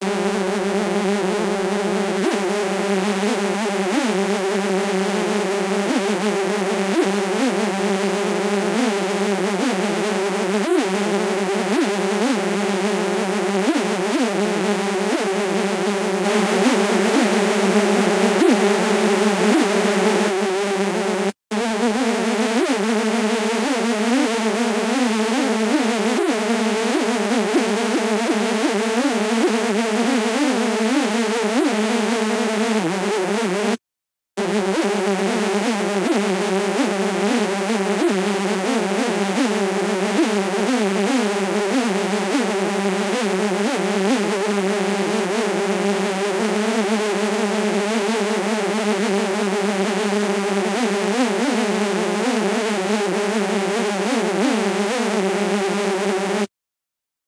mosquito buzz
A sound of mosquito, wasp or fly synthesized on software Operator synth